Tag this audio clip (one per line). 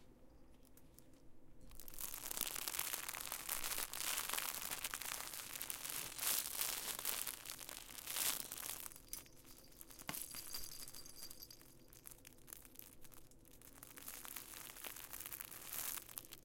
crinkle
foil
lamaze
natural-randomness
toy-store
white-noise